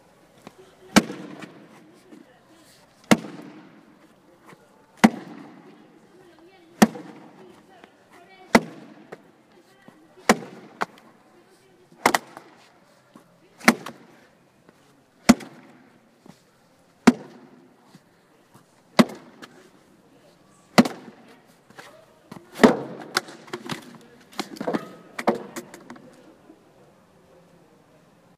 Cardboard tube hitting walls
A drunk friend hitting walls with a cardboard tube at 03:50 AM and some people cursing in the back
cardboard, city, hit, impact, night, thud